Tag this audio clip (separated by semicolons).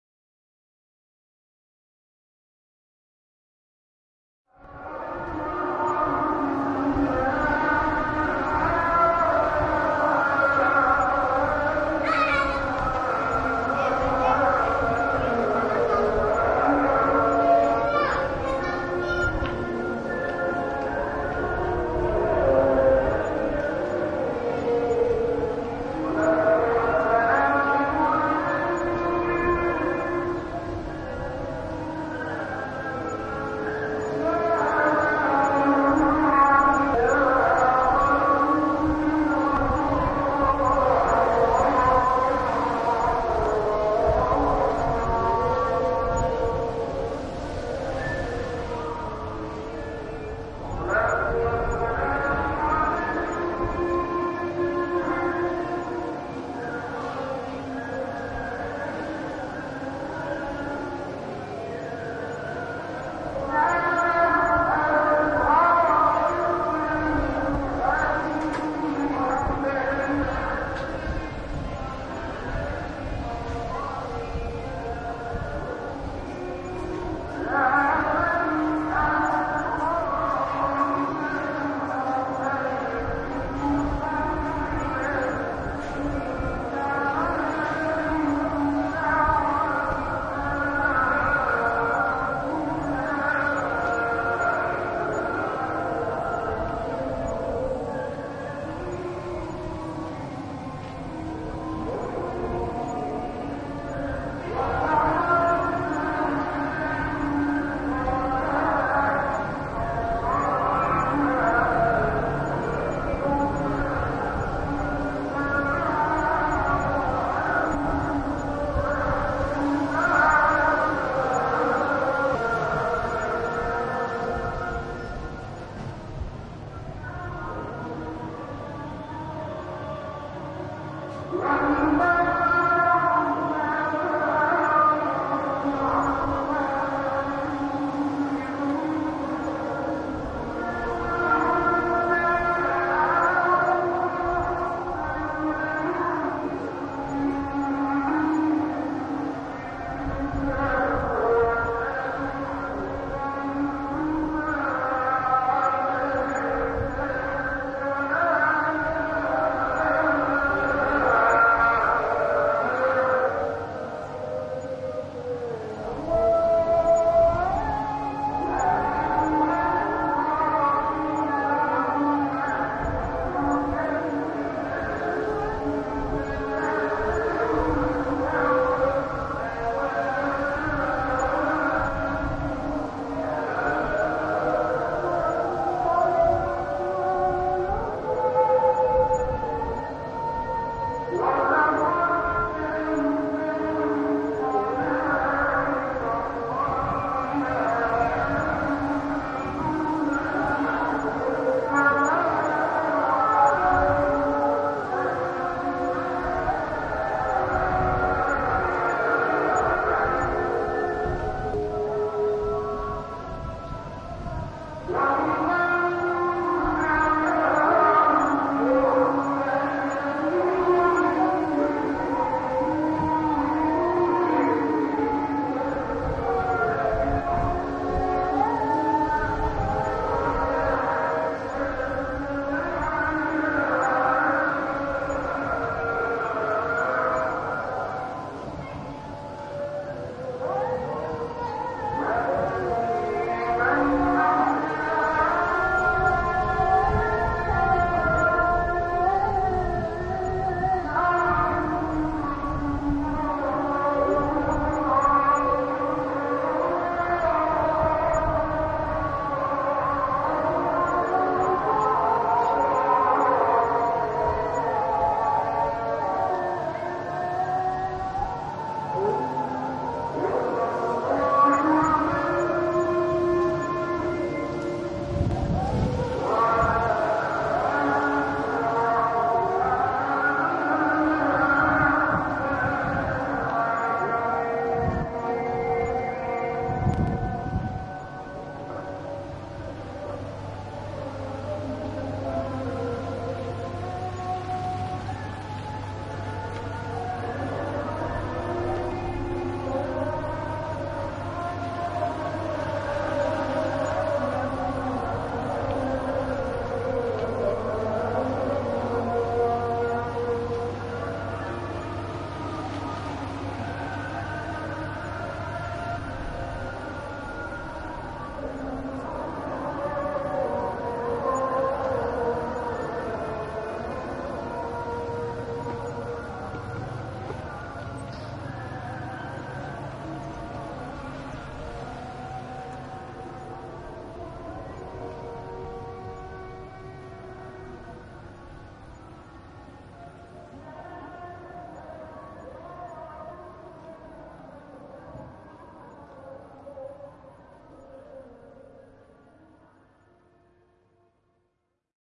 Adhan; call-to-prayer; chant; echo; field-recording; holy; Indonesia; Islam; island; Kalimah; loudspeaker; Maluku; mosque; muezzin; Muhammad; Muslim; pray; prayer; recitation; religion; religious; Shahada; stereo; sunset; Takbir; Ternate; voice; worship